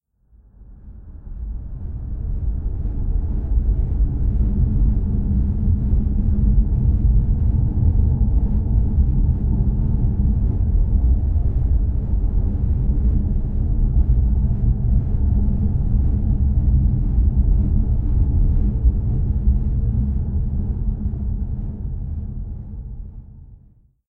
A low rumble sound i designed.
huge, machines, rumble, technica, unearthly